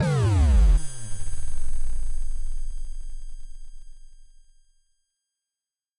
electric boom
modulation, effect, synth, fx